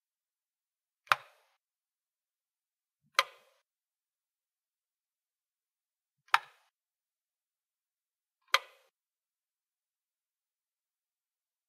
Hyacinthe light switch edited
light switch on/off